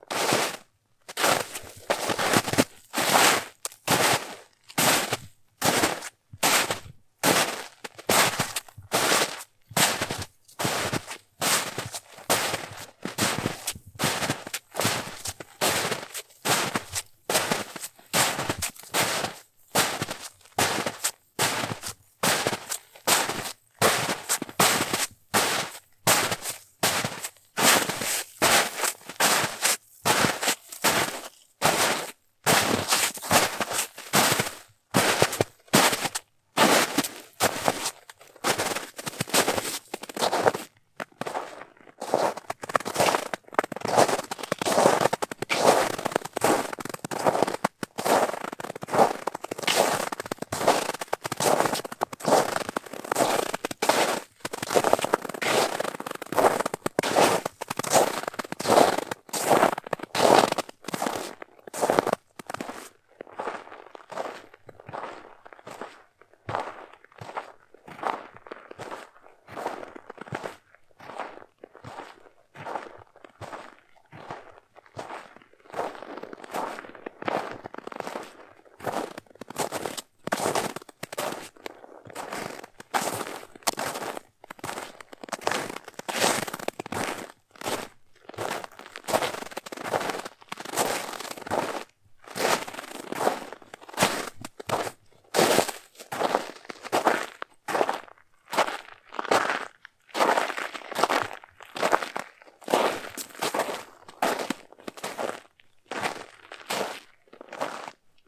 Snow walk
Walking in snow. Recorded with zoom h4npro and Rode video micro. Hope you can use it.
foot, footstep, footsteps, freeze, snow, step, steps, walk, walking